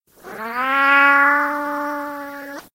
distort-meow
I made a cat sound like it was in the mating season by slowing down the "meow" and dropping it an octave.
miaow, meow, cat